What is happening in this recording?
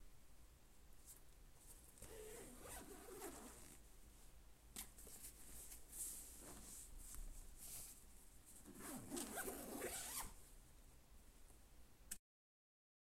A bag being unzipped and zipped up